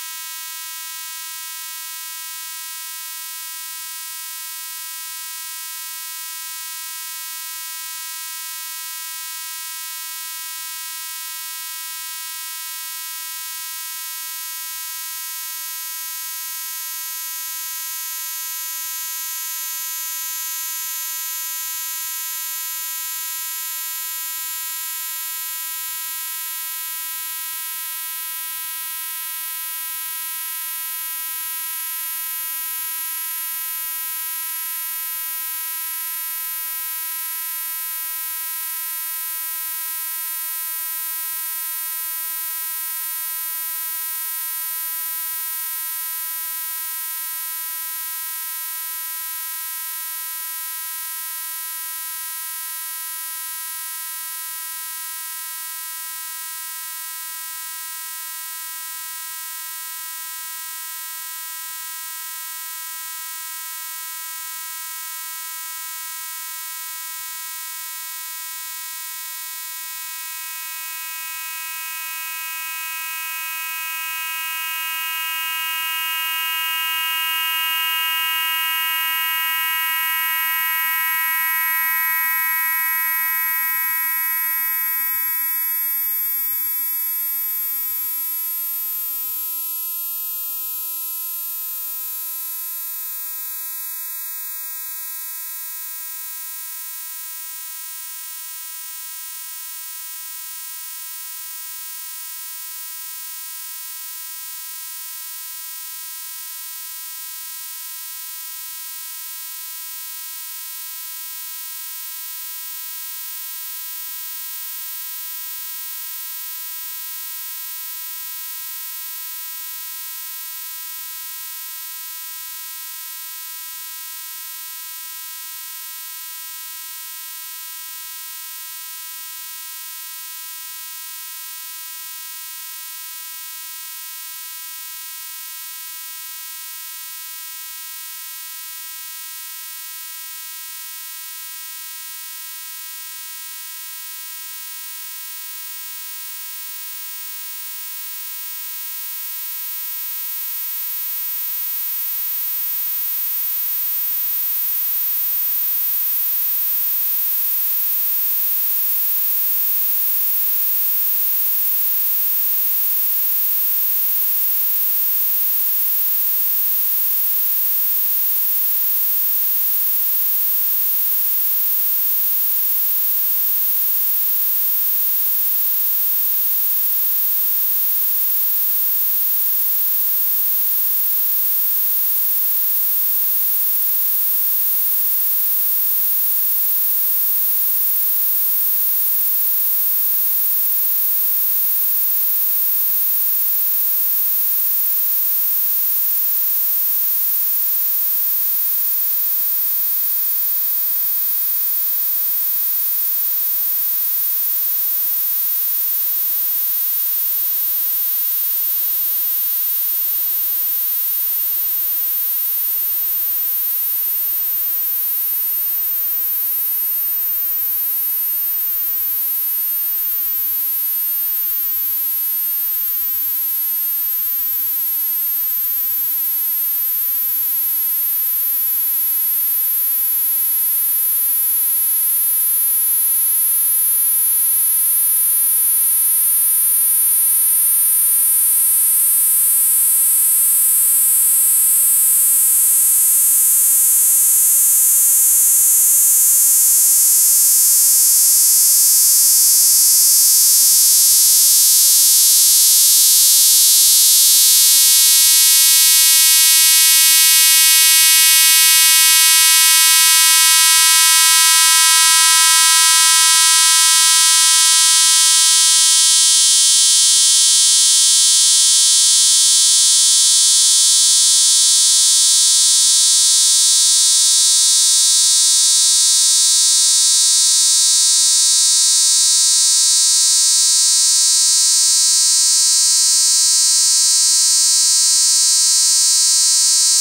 Alien's bad day
Well now I'm going to get my own back on him and do it to his avatar. I took a screen print of it, trimmed it and saved it to my computer. This image-to-sound was made with AudioPaint 3.0. Various lengths and styles of this available.
alienxxx, electronic, image-to-sound, noise, processed, weird, zap